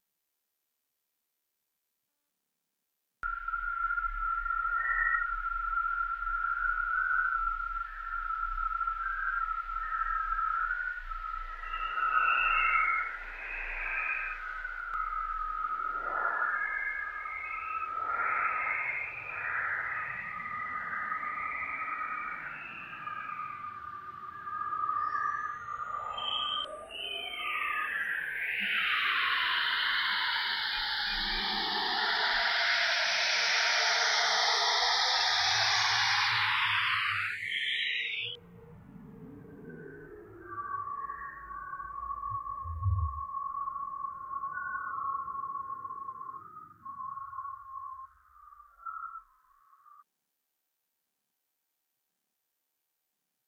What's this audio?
Barley Sf Skyline Fixed02
Click the little, "Change Display," icon above, and you'll see that this sound is, in fact, a self-portrait along the San Francisco city Skyline. The audio was generated through an interpolation process in which xy values were assigned to a photograph. The data was then interpolated as audio with varying frequency and amplitude according to color placement within that grid. (x = time, y = frequency.) The result is that you can listen to a picture of the San Francisco city skyline, or anything else for that matter.
You'll note that the image appears to be distorted within the spectrograph, this is because frequency is really a logarithmic value, thus the visual reproduction of the interpolated audio data will be squished.
Campus-Gutenberg, Engineering, photosounder, spectrograph